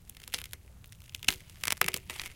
Ice Crack 9
break, crack, foley, ice, ice-crack, melt